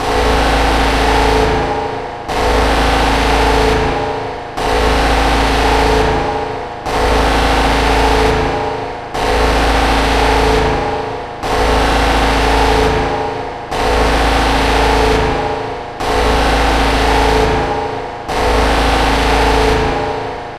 Great Factory Alarm
A deep roaring alarm siren, like in great industrial halls or facilities.
danger, factory, industry, drone